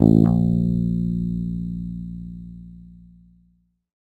First octave note.